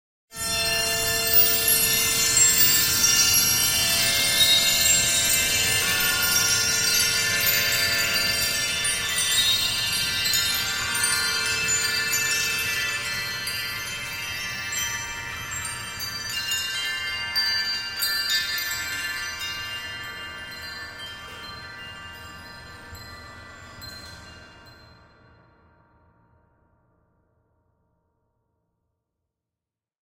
Ambience, Wind Chimes, B
As four sounds were combined the noise level was particularly high, though it quite ironically makes it sound like there is wind blowing in the background which I thought added a nice touch.
An example of how you might credit is by putting this in the description/credits:
Originally edited using "Kontakt" and "Cubase" Software on 16th November 2017.
wind, ambience, sparkle